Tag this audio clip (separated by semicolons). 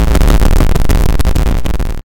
crackle; static